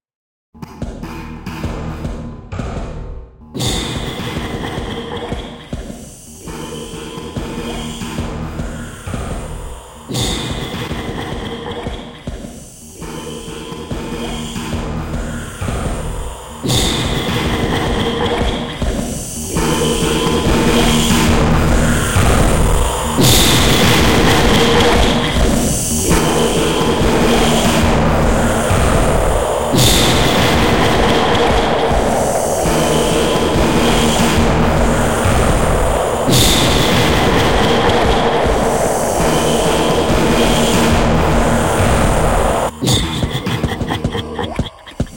Questionable nonsensical music like noise
evil, Laughing, voice, weird